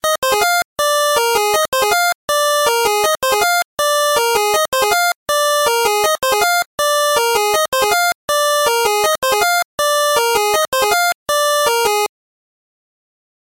made with LMMS. plugin used: FreeBoy.